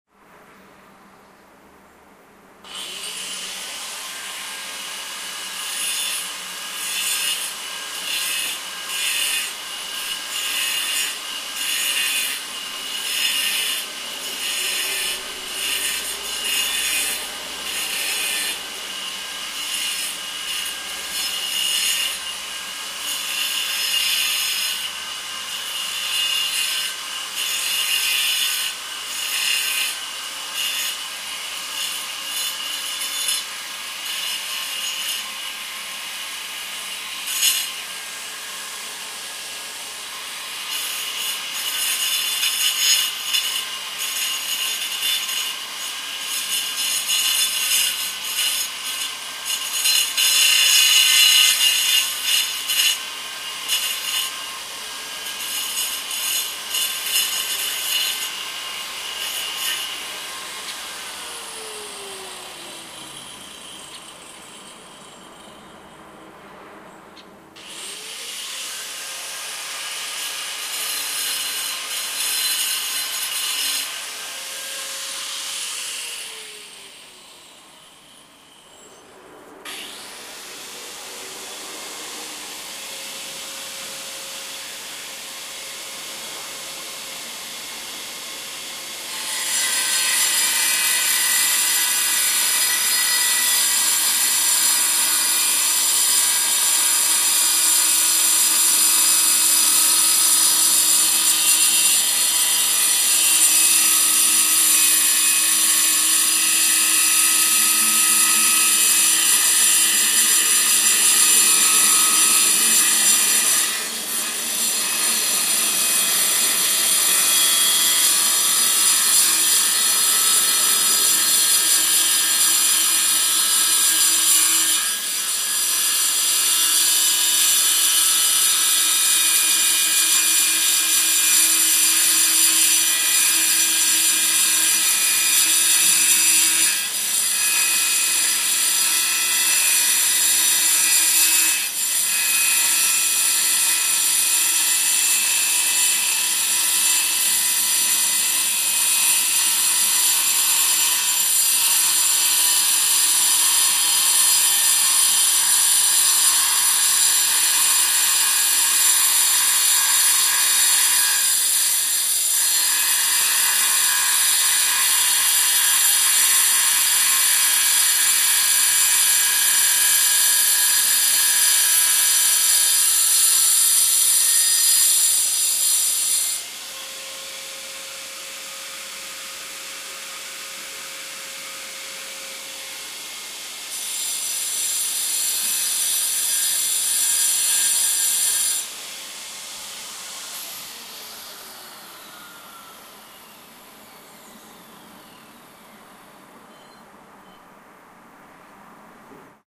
circular saw
cutting, metal, circular, saw